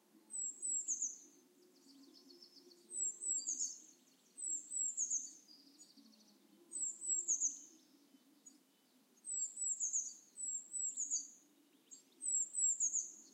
very high pitched call of little bird (presumably a Chiffchaff, Phylloscopus) / canto agudisimo de un pajarito, probablemente un mosquitero